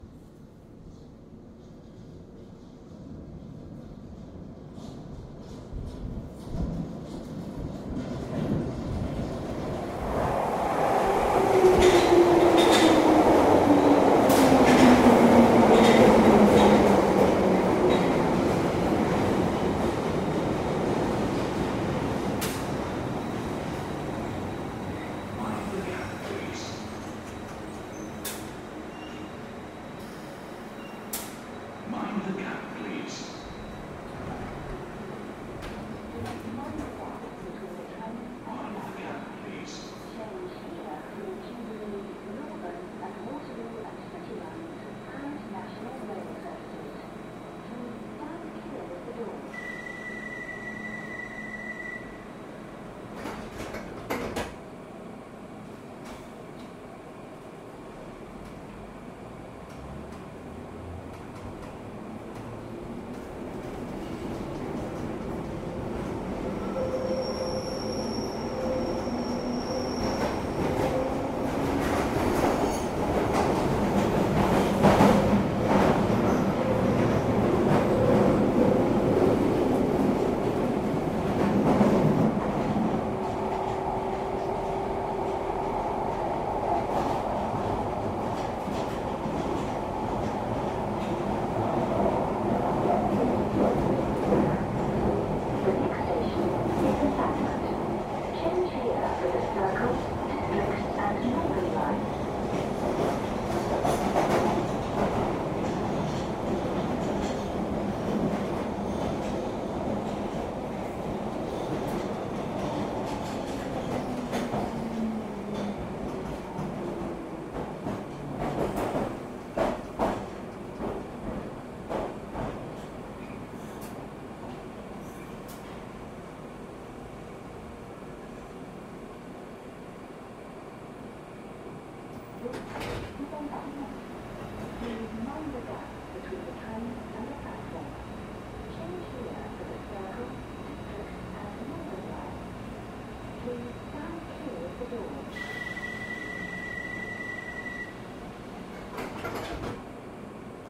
London Underground Boarding and Interior
London Underground train approaches Waterloo tube station before boarding and doors close. Further recording of the train interior.
Recorded using Zoom H6n with MS module.